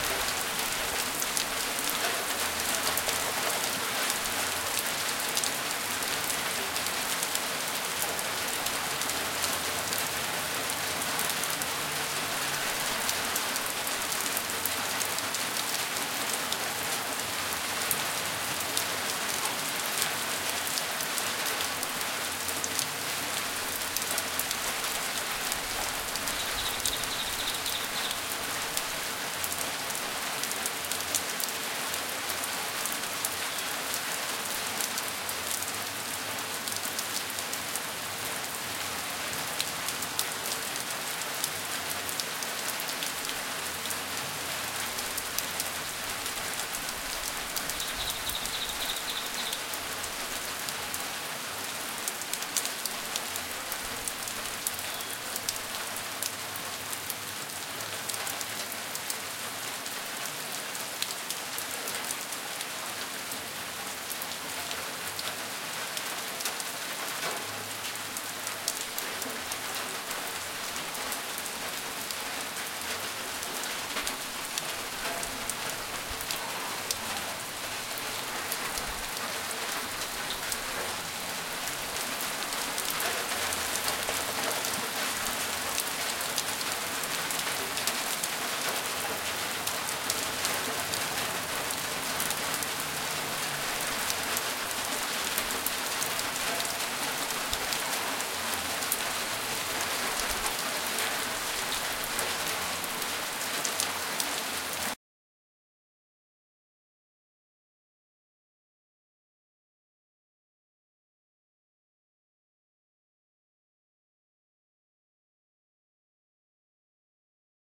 Rain, sheet roof
Field recording of the rain outside my house under a sheet roof with a Zoom H4n Pro. I equalized cutting the low frequencies.
In the foreground is a water stream hitting a concrete sidewalk and the sheet roof, and in the background some birds singing occasionally.
12 seconds of silence escaped me at the end.
weather, rain, field-recording, ambience